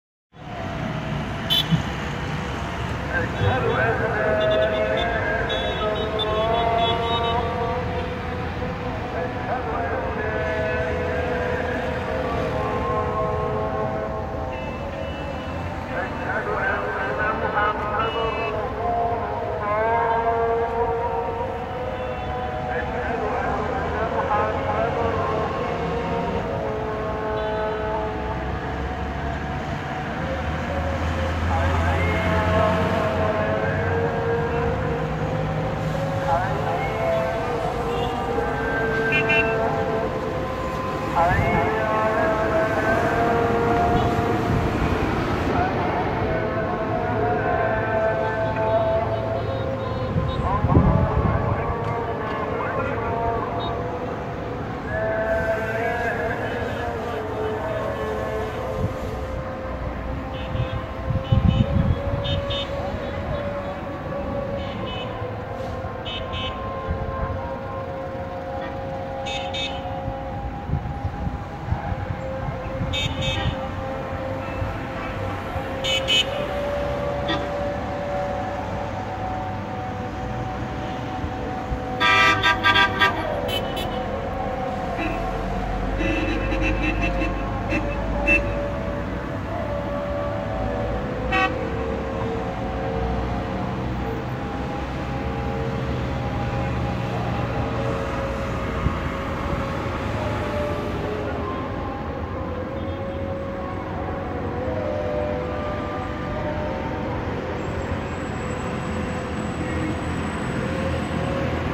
Muezzin on a busy street in Giza (short recording)
A muezzin is singing in the evening in Al Mansoureya Rd, Al Haram, Gizeh, Egypt. The road is full of traffic and many people sound the horn.
Recorded from a roof top.
street, traffic